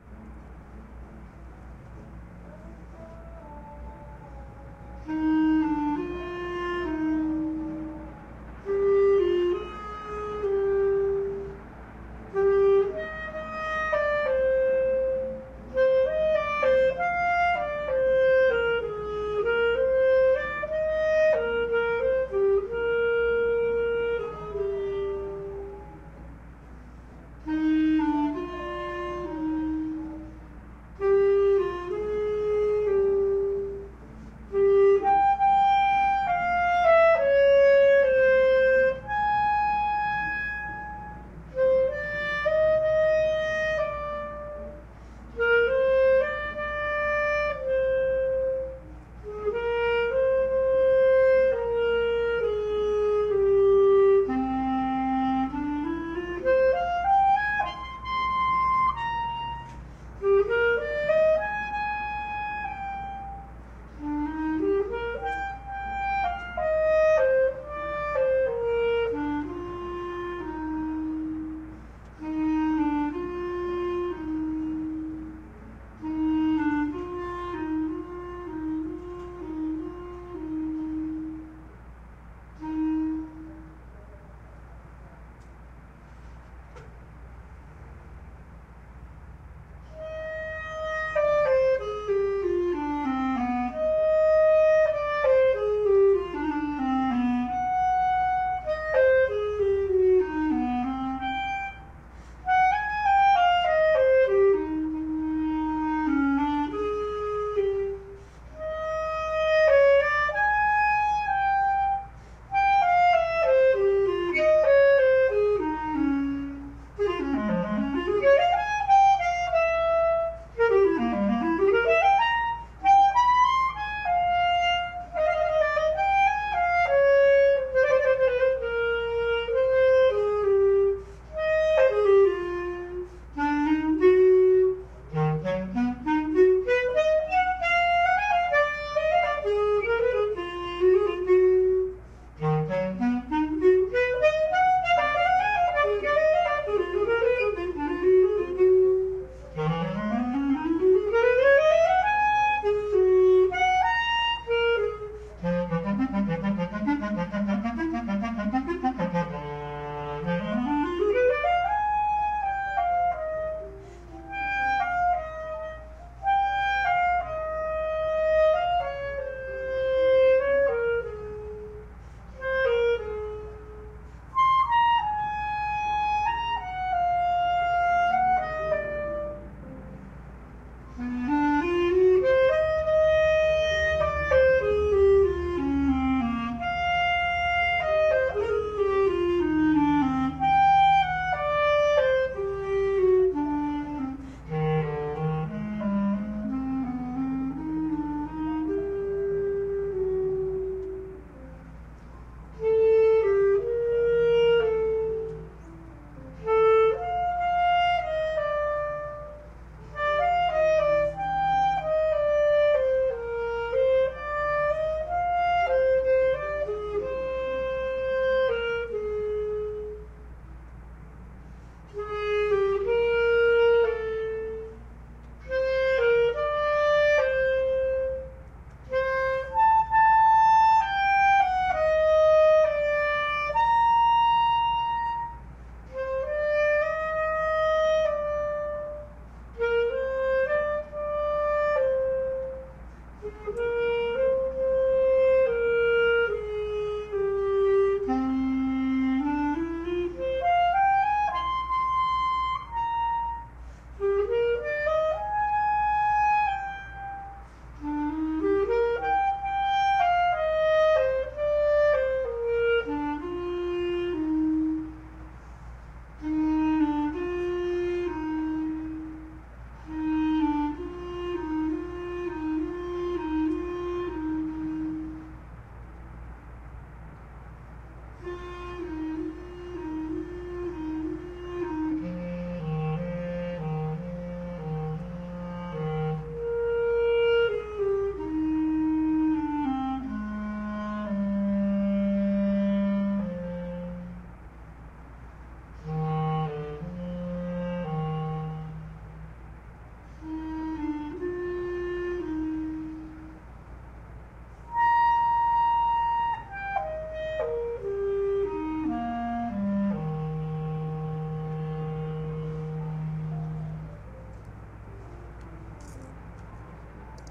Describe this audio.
Saint Seans Sonata for Clarinet practice performance session